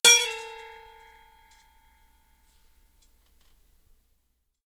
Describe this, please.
PliersBottom3b-SM58-2ftAway-Pitch-1-Formant-4
I struck the bottom of a wire suspended 9 1/2-inch pressed steel commercial mixing bowl.
I struck the bowl's bottom with a pair of 8-inch Channellock steel pliers.
The audio was recorded through a Shure SM58 stage microphone, through a Roland VT-3 Voice Transformer into Audacity.
The main Pitch in the VT-3 were set down by approximately 20% of an octave.
The Formants on the VT-3 were set down by approximately 80% of an octave.